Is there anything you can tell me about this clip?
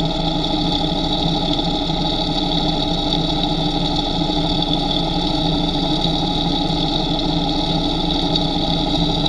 Using an automotive stethoscope on an alternator.